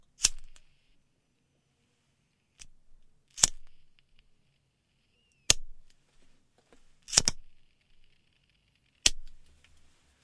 noise of a cigarette lighter, recorded using Audiotechnica BP4025, Shure FP24 preamp, PCM-M10 recorder
cigarette, collection, disposable, flame, smoking, zippo